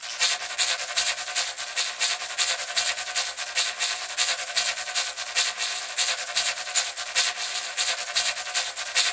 shaker sounds distorted and looped
bitcrusher, distortion, sounddesign, shaker, dub, reaktor, echo, experimental